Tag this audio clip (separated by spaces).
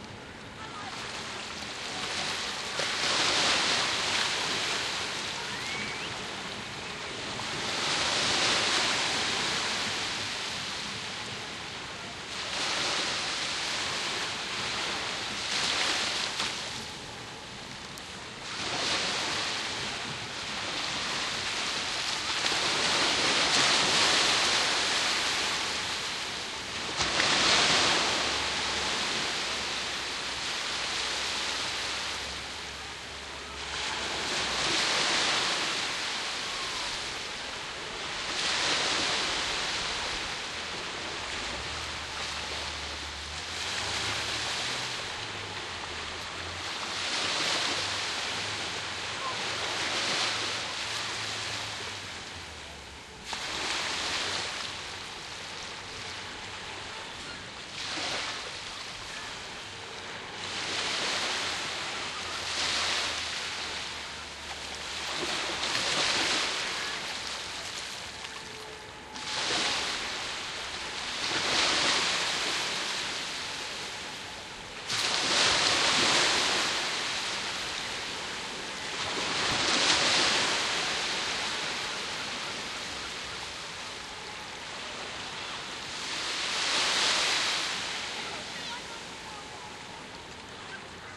Waves Beach Field-Recording Sea